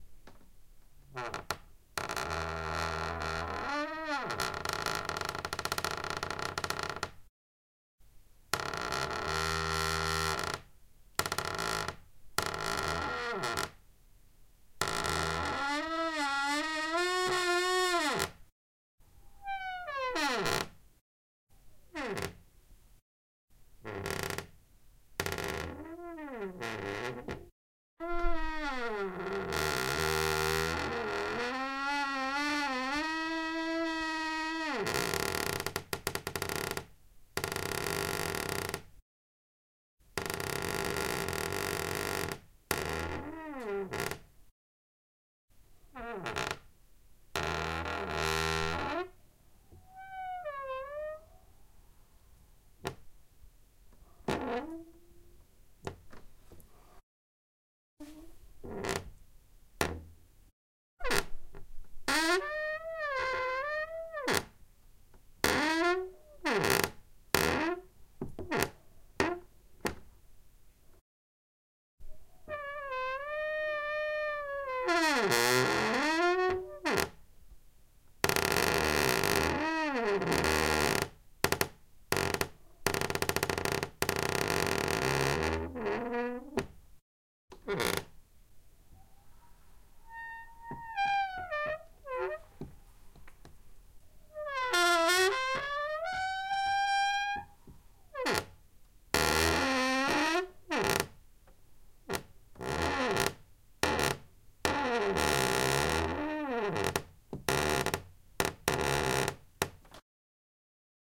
squeay creaking door
close-door, field-recording, open-door